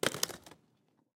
Skate-concrete 2
Rollerskates, Foleys, Concrete-floor